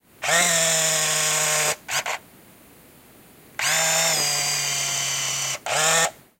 Sounds from kodak 1035z camera lens servo, recorded with tascam DR07 portable recorder.